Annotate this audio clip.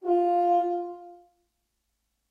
tuba note17
game, games, sounds, video